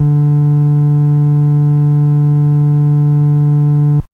I recorded this Ace tone Organ Basspedal with a mono mic very close to the speaker in 16bit